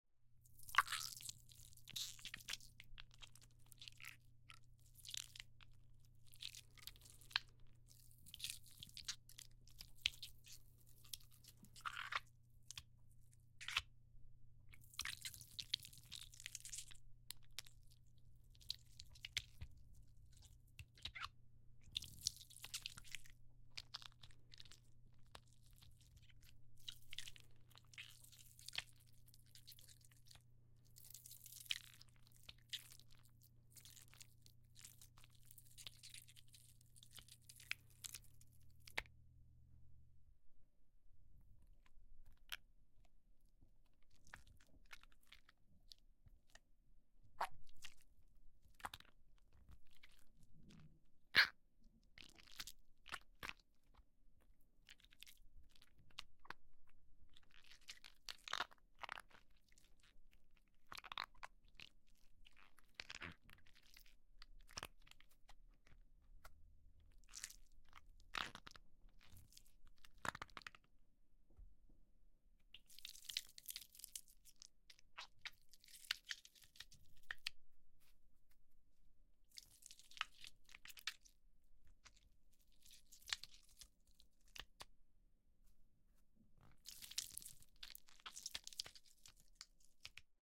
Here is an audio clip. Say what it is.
My recording of a squishy toy. recorded on an Aston Spirit.